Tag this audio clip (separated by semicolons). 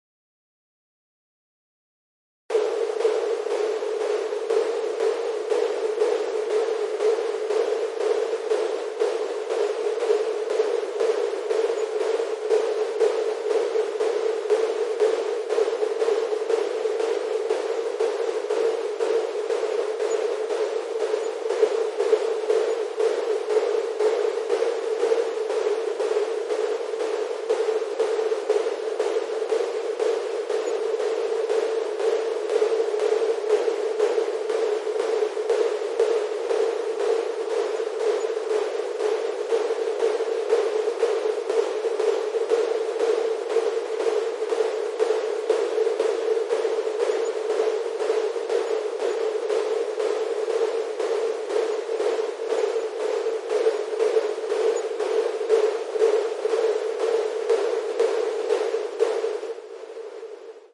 Noise; Audacity; Basic; Effect